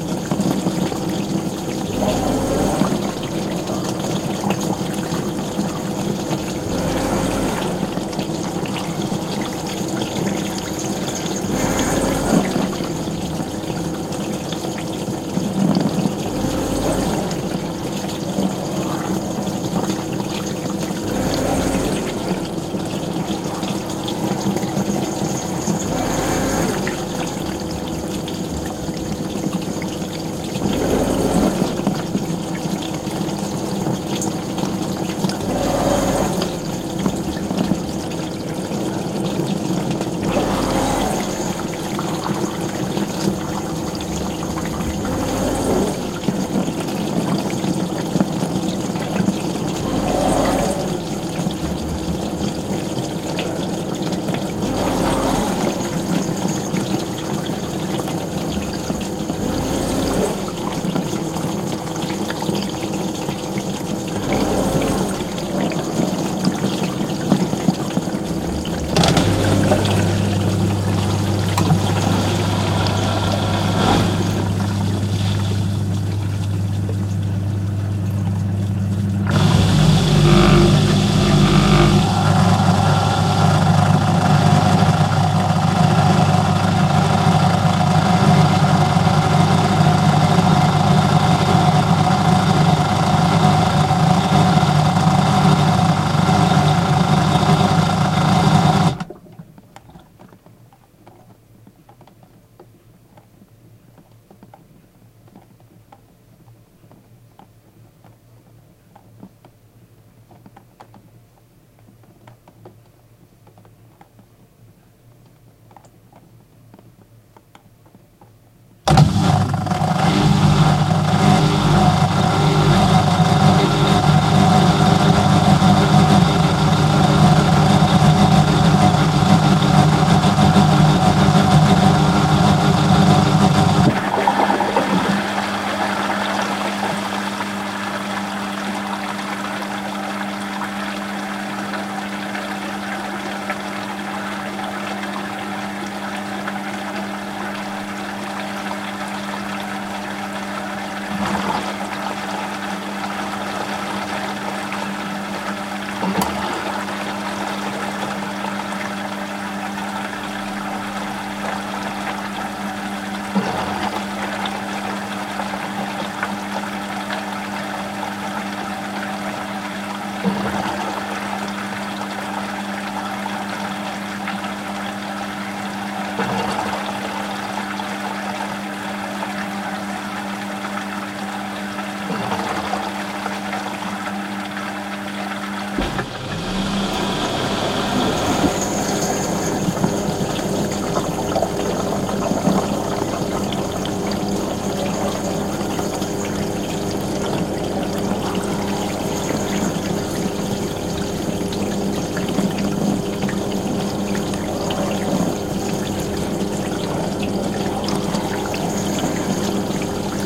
I placed the microphone against the bottom of a running dishwasher. The sound goes through a couple of stages. Mostly muffled wet sounds.
Recorded With Edirol R-1 & Sennheiser ME66.